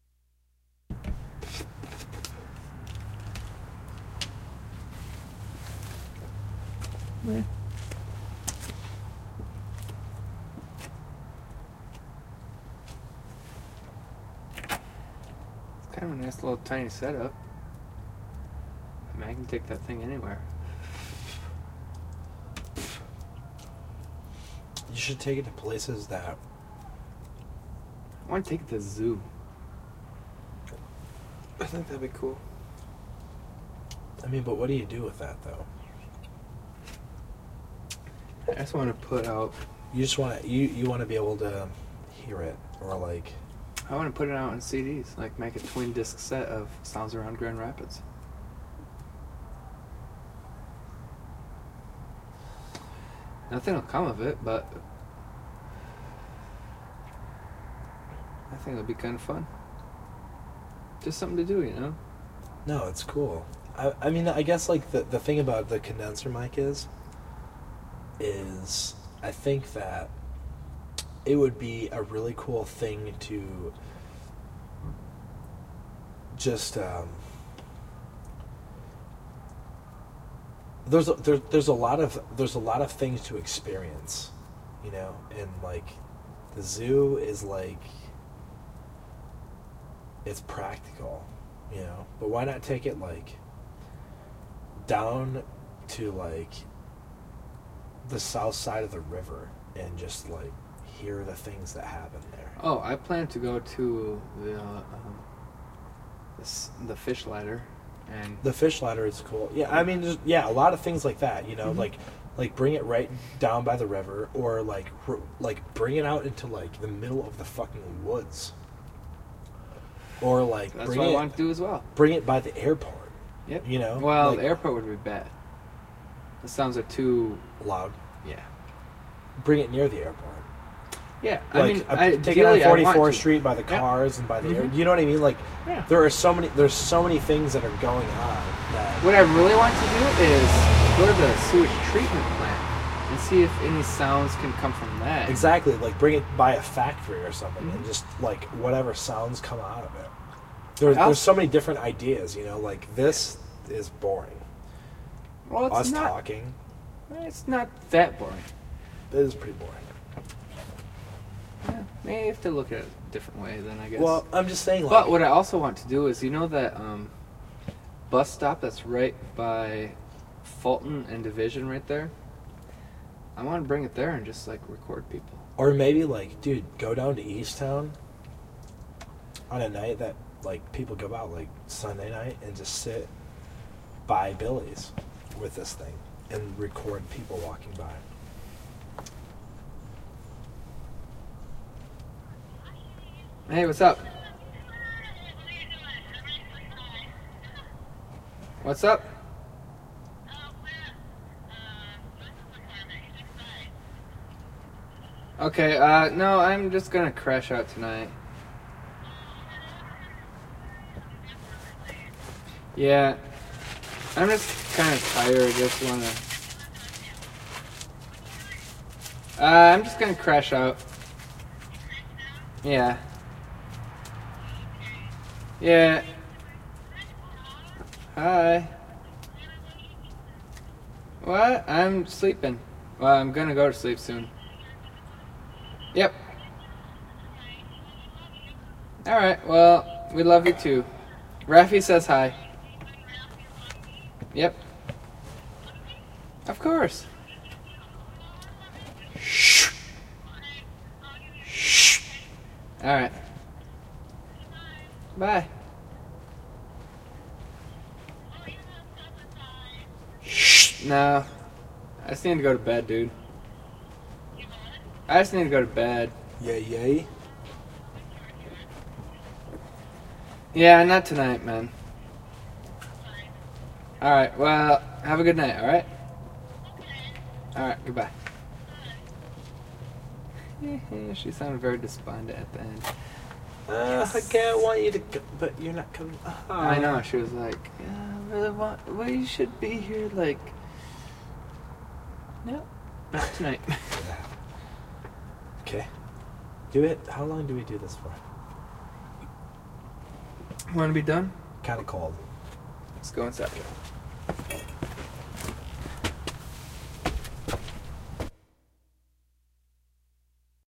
field smoking-2
smoking in front of my apt with rode stereo condenser
late, night, smoking, street